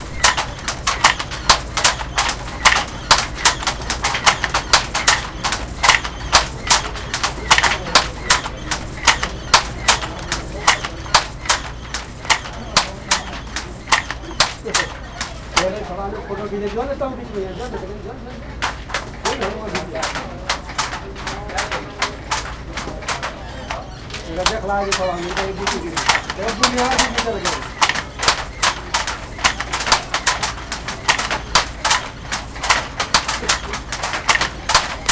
Bhagalpur, silk weaving handlloom
In an Indian textile weaving mill at Bhagalpur, in Bihar state, we hear a handloom working, weaving silk fabric.
clack, clatter, factory, field-recording, hand-craft, industrial, loom, silk, textile, weaver, weaving